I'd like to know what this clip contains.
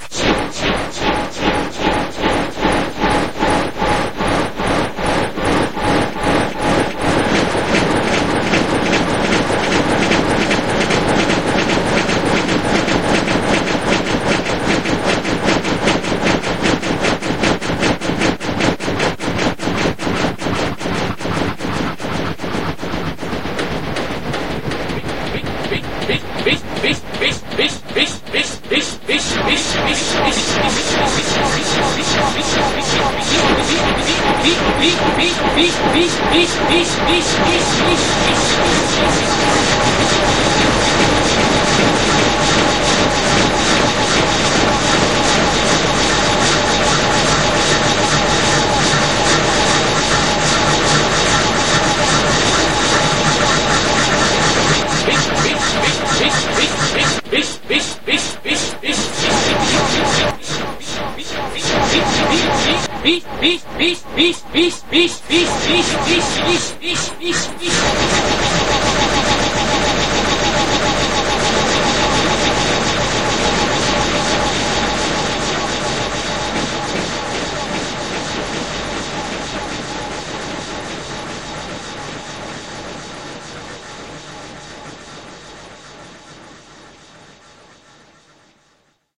hanstimm MACHINE sound IMPROV
I love being contrained by minimalistic restraints when improvising. I was enjoying hanstimm's machine sounds so , using only the loop function of this site, I made an improvisation using his 'machine' pack.
hanstimm, machine, improv, minimal